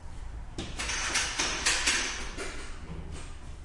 In a three-bay oil change shop. The sound of an overhead hose reel retracting.
Recorded using the M-Audio Microtrack and its stock stereo mics.
ambience, field-recording, garage, machine